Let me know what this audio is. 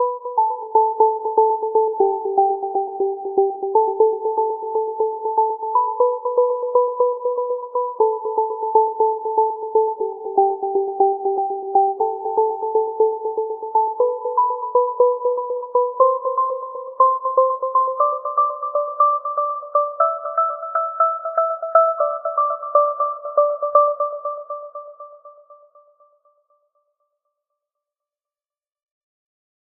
Bell Synth line
techno, progressive synth,bell sound
trance, club, melody, dance, electronic, electro, techno, synth, loop